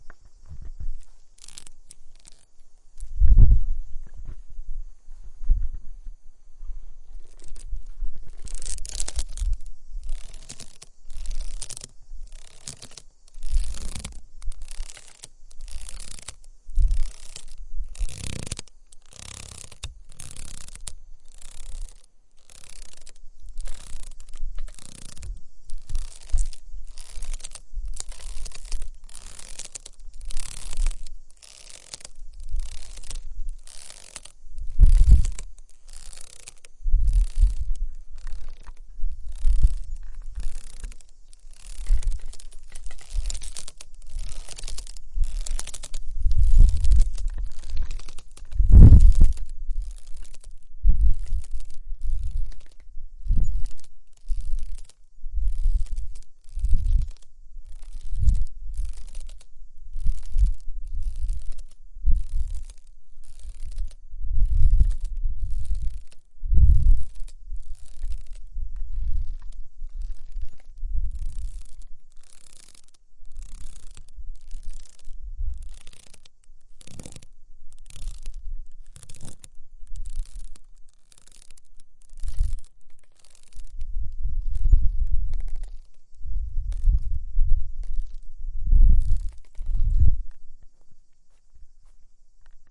swing ropes
ropes of a handmade swing on a tree
recorded with a Zoom H4N
an oak branch and the ropes of a traditional swing moving on it
recorded in navarra north of spain
could work for ship and traditional navigation sound
tree, wind